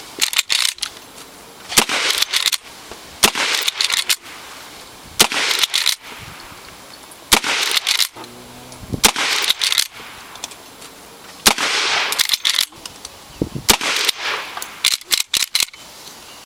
Seven rounds from a lever-action Marlin 1984C (cowboy rifle) in .357 Magnum. In most cases, the reports come rapidly on one another - this was necessary in order to eliminate background noise pollution. In one or two cases, there is a nice "decay" as the shot echoes into the distance.
1984c, 357, action, bang, carbine, cowboy, gunshot, lever, mag, magnum, marlin, report, rifle, seven, shots